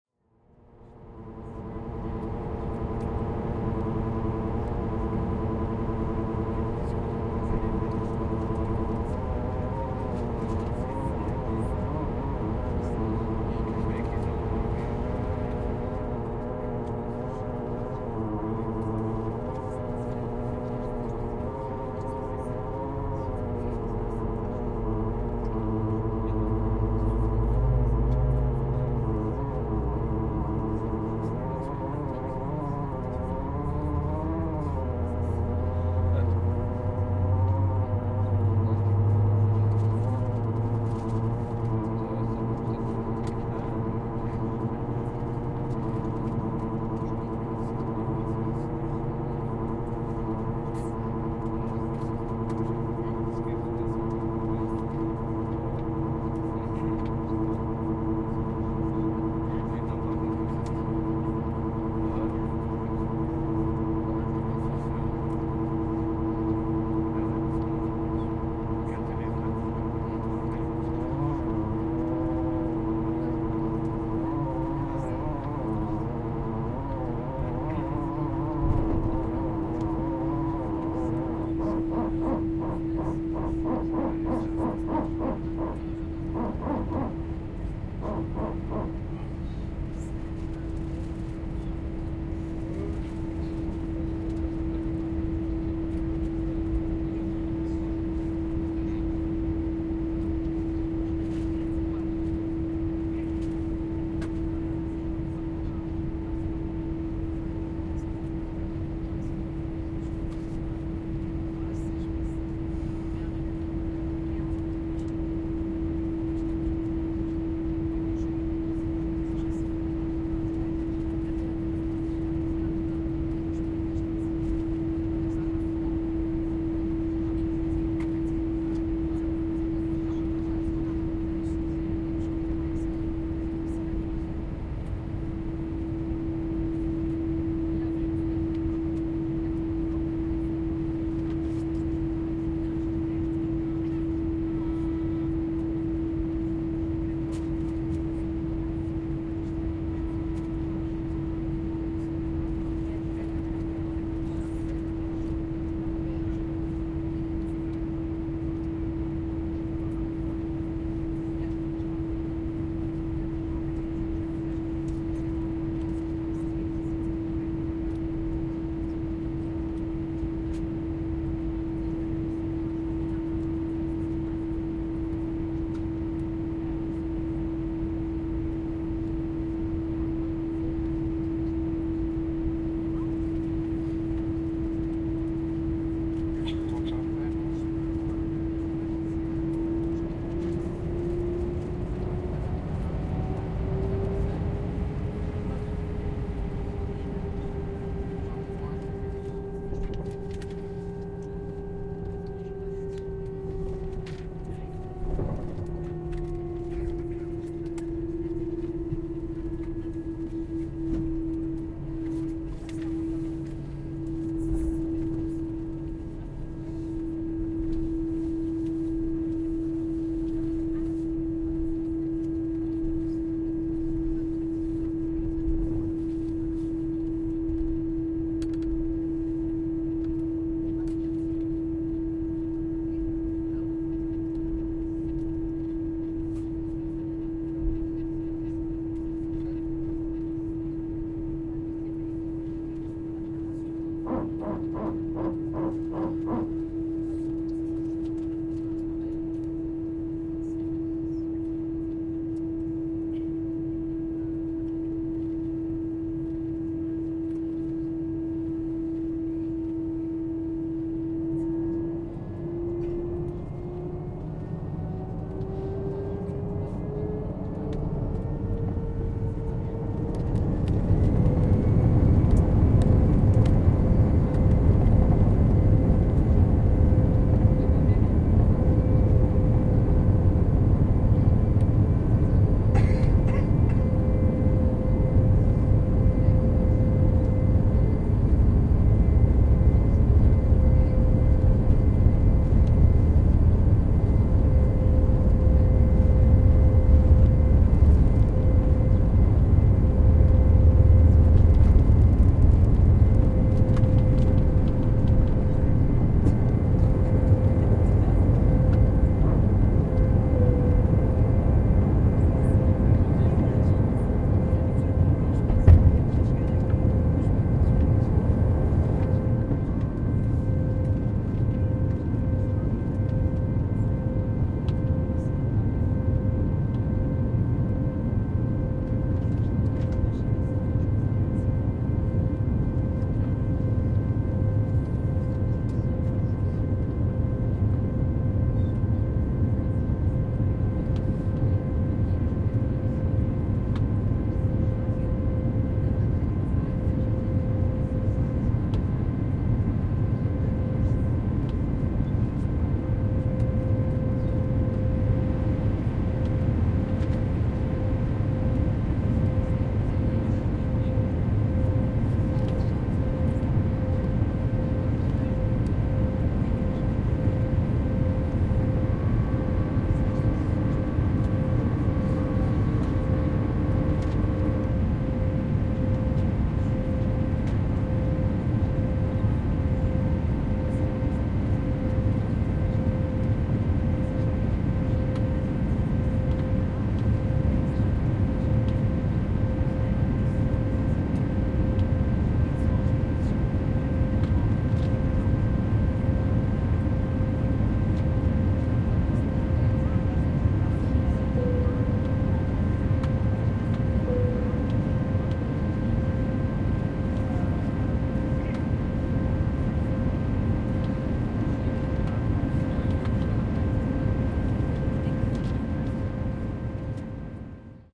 Plane takeoff
Recorded inside the plane from a passenger seat. The plane is driving to the landing strip, takes off and flies from Berlin to Basel.
aeroplane, aircraft, airplane, airport, berlin, cabin, engine, field-recording, flight, fly, flying, germany, launching, liftoff, noise, plane, takeoff